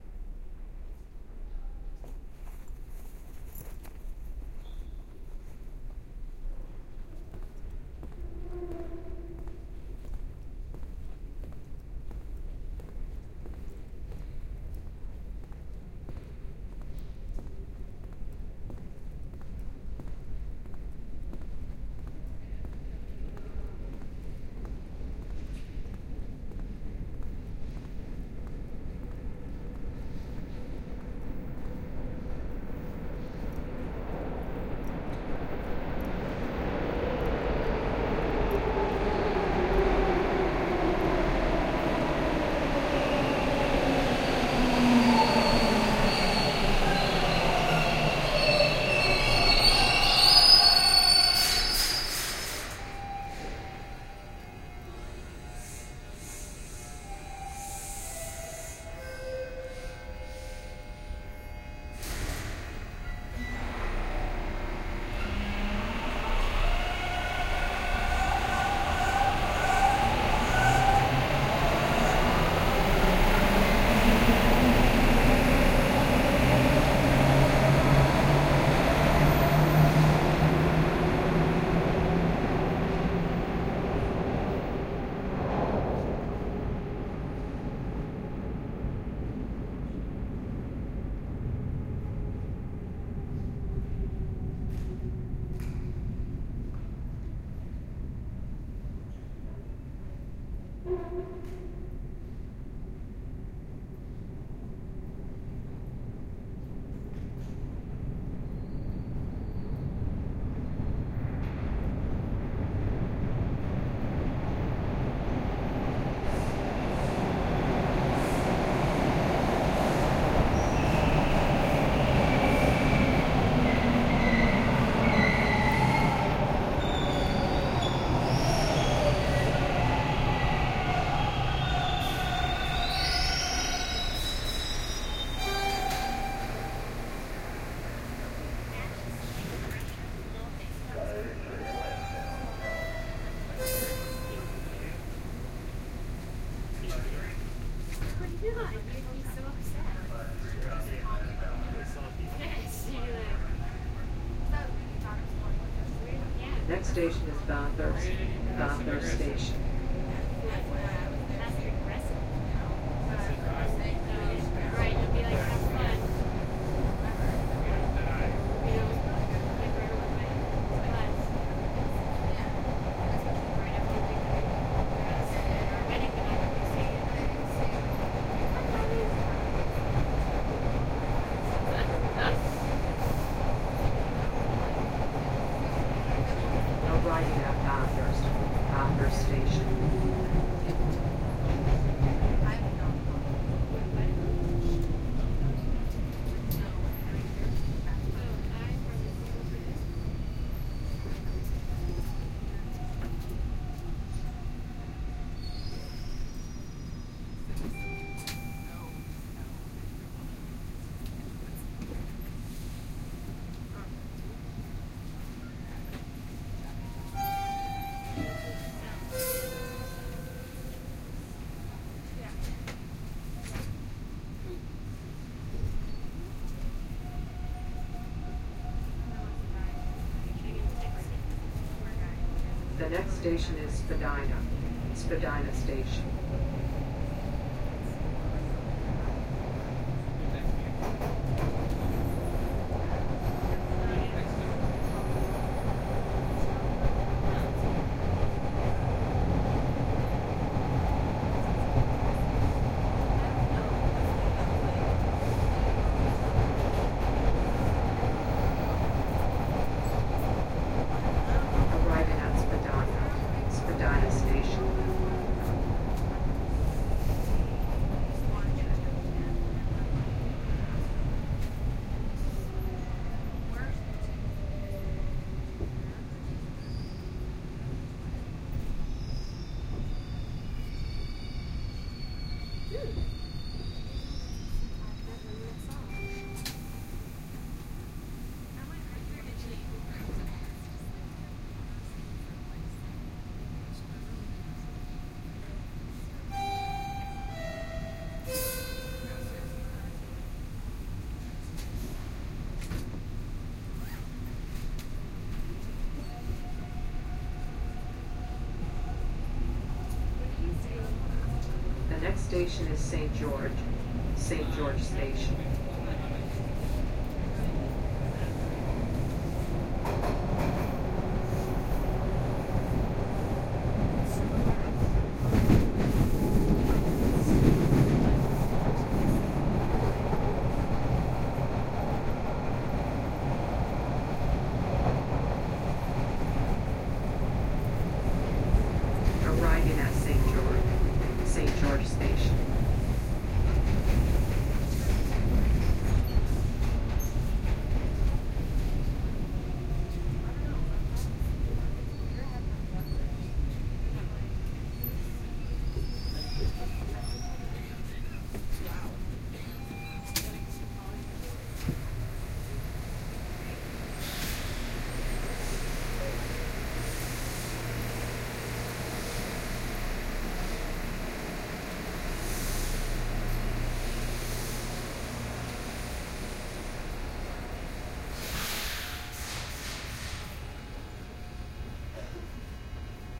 Stereo binaural recording. Measured footsteps through the subway platform, board subway, short ride, get off subway.
ride, field-recording, platform, stereo, transit, subway, binaural